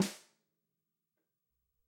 dry snare center 02
Snare drum recorded using a combination of direct and overhead mics. No processing has been done to the samples beyond mixing the mic sources.
acoustic, drum, dry, instrument, multi, real, snare, stereo, velocity